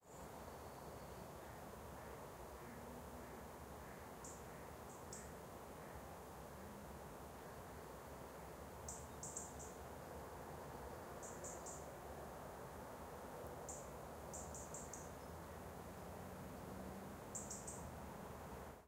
Calm Woodland Soundscape
Calm, still weather.
Recorded using a Zoom H4N.
Location of the recording was in England, Cheshire, in a woodland area by a mere.
ambiance, ambient, autumn, bird, birds, cheshire, Duck, England, field-recording, forest, goose, mere, nature, soundscape